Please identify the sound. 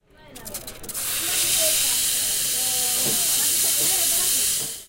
Coffee machine steam
Steam of a coffee machine in the restaurant of the campus.
machine, steam, restaurant, UPF-CS12, campus-upf, coffee